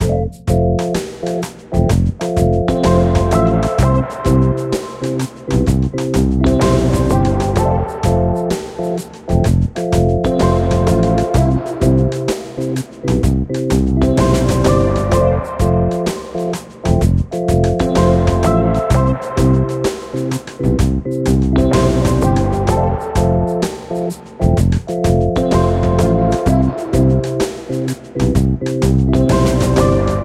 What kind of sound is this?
Waiting in the cartoon lounge for the airplane. Let's go on holidays!